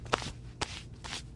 Brushing Off Clothes
Recorded someone brushing off their sleeves.